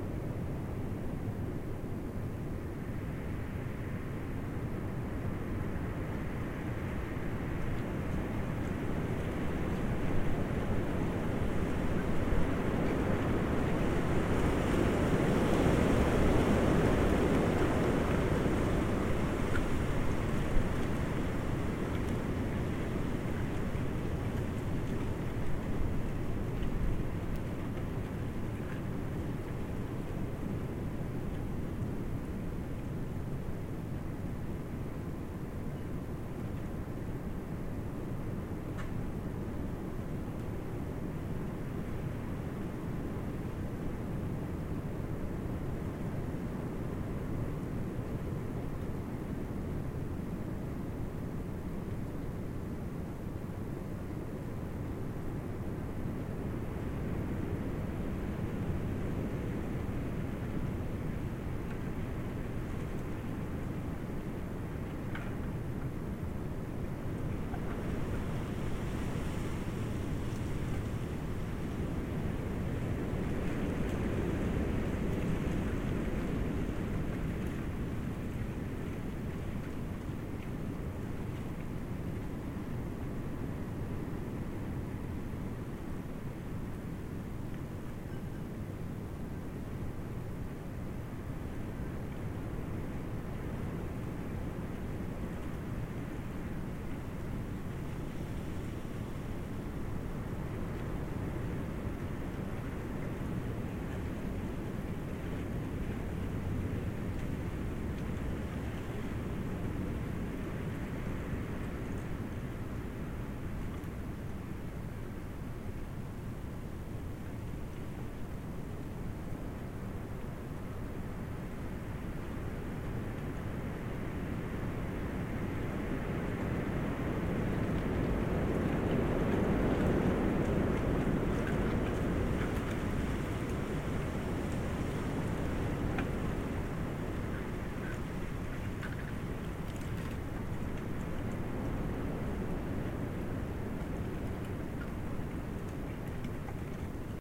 Wind blowing through trees in my woods.
tree, blow, wind